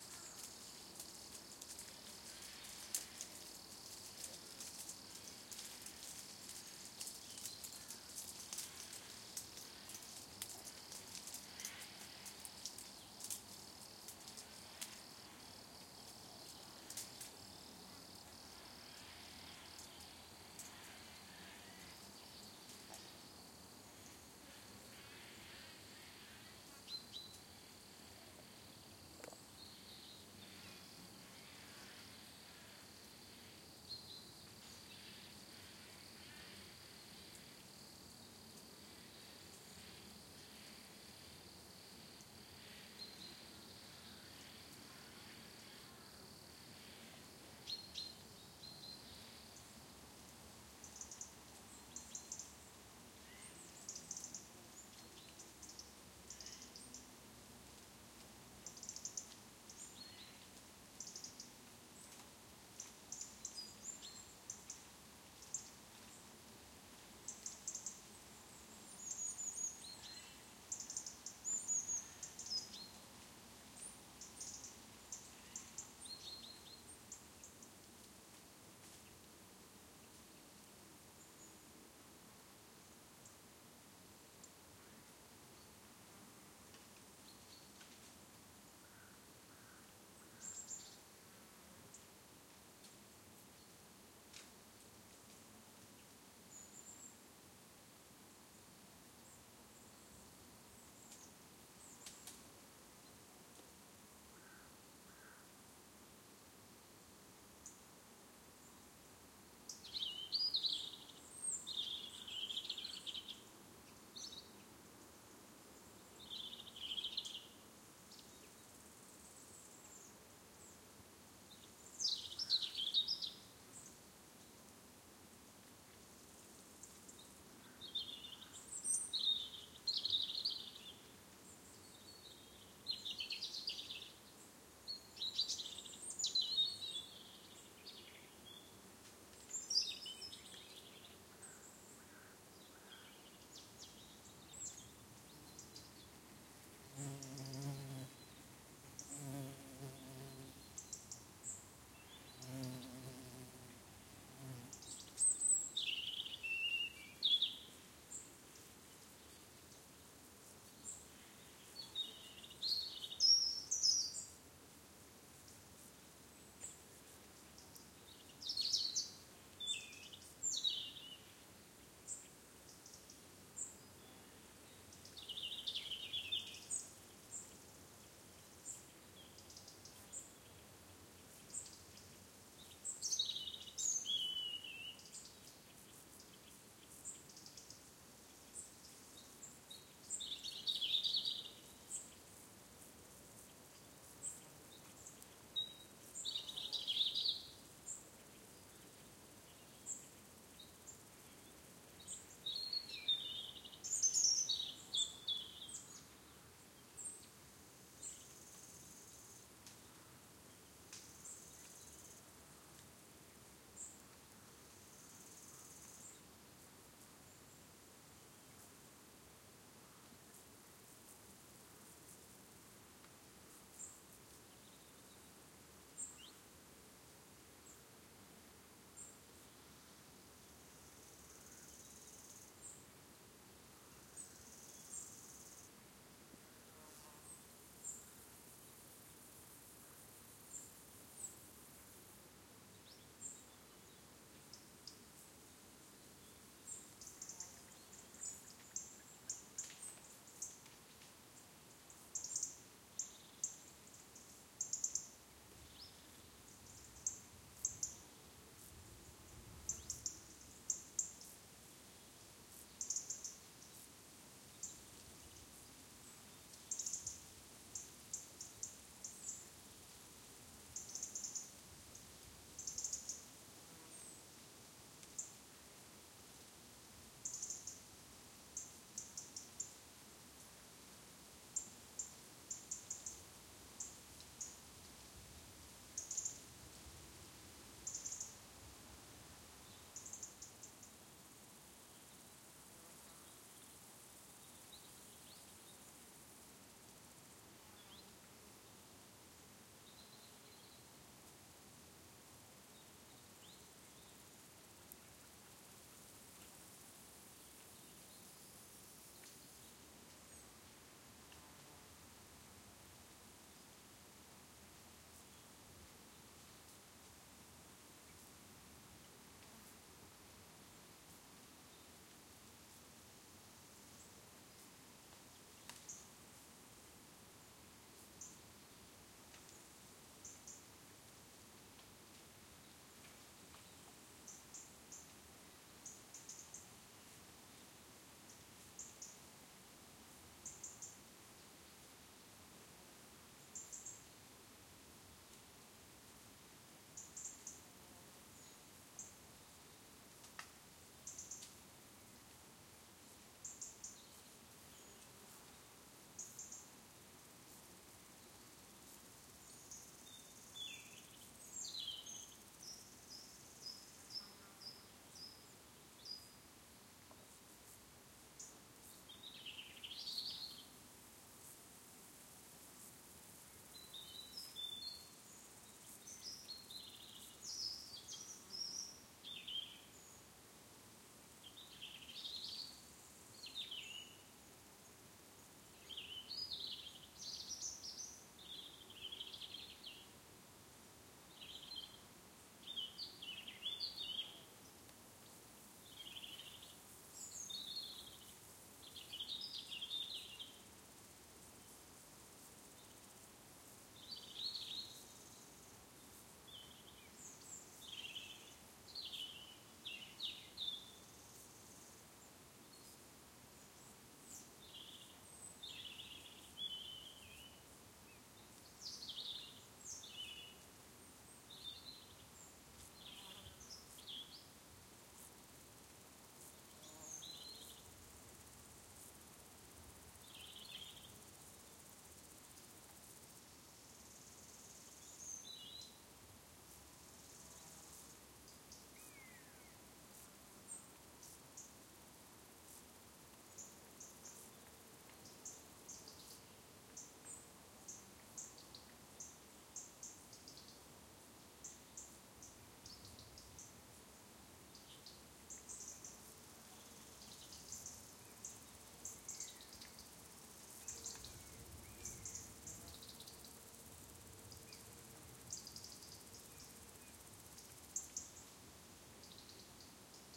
Lots of bugs flying around, crickets in the background, bird calls, wind and leaves crackling as they fall.
Microphones: DPA 4060 (Stereo Pair)
Atmosphere, Birds, Bruere-Allichamps, Bugs, Buzzing, Cher, Countryside, Field-Recording, France, Wind